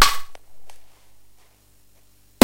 The dungeon drum set. Medieval Breaks
dragon, idm, dungeons, breakbeat, amen, rough, breakcore, medievally, breaks, medieval